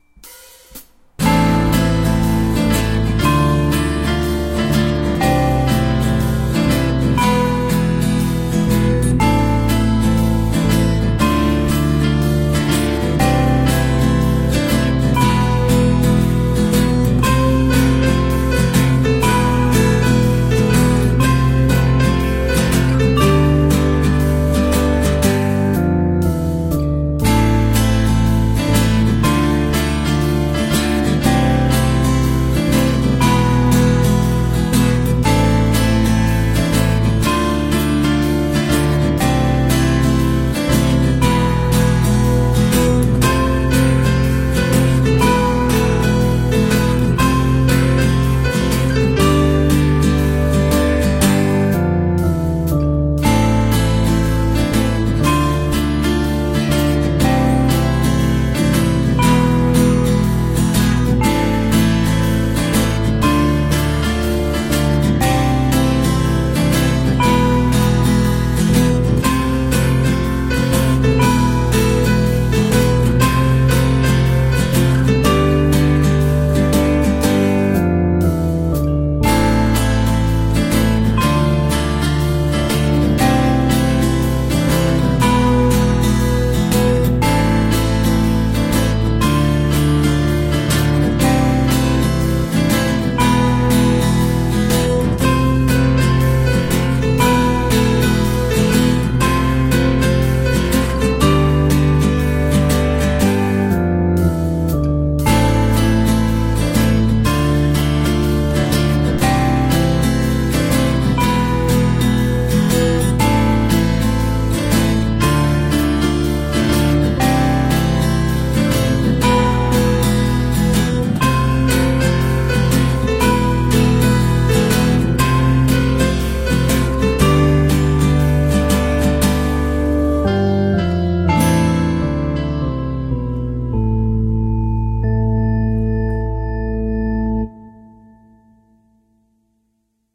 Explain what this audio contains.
A catchy melody played on piano accompanied by guitar, organ and bells.
catchy, et-stykke-med-bart, happy, instrumental, music, piano